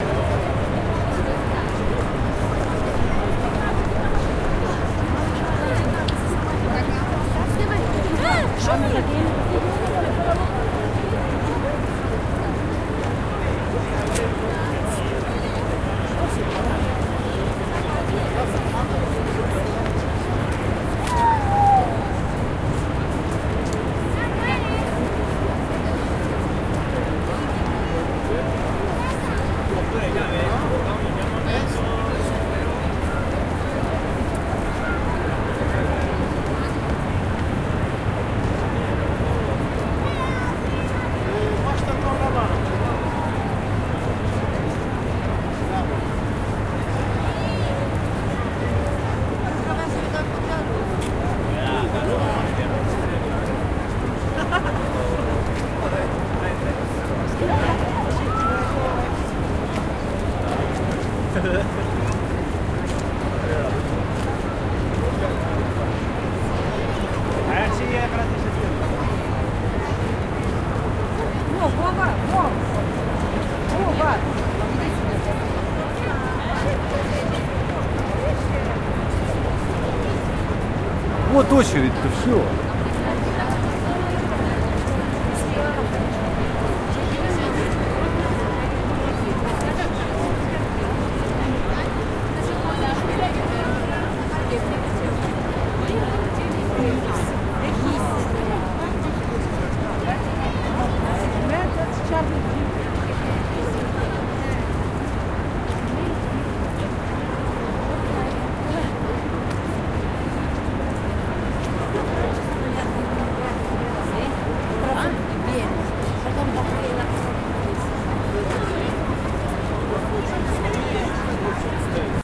I made this recording of ambient backgroud noise directly beneath the Eiffel Tower. The shape of the Eiffel Tower creates a unique acoustic environment in the vicinity of the tower, especially beneath it. You can hear a much higher level of background noise than you would hear in an open area, and the noise is unusual.
The tower is made of thin pieces of iron arranged into a complex and very large lattice, which reflects and slices and dices sound in a unique way. Thus you have a high level of very even and unidentifiable background noise as noises from the ground and the platform get bounced around and distorted by the structure of the tower.
This recording was made from the ground, with microphones pointed straight upwards about 2 meters off the ground. Noises from the ground travel upwards and bounce around the inside of the tower, then drift back down. There are noises in the tower itself as well, such as elevator motors and people on the platforms.
field-recording, eiffel, paris, eiffel-tower, france, ambiance, background